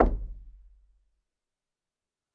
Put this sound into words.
Knocking, tapping, and hitting closed wooden door. Recorded on Zoom ZH1, denoised with iZotope RX.